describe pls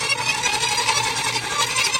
virtual raven sound effect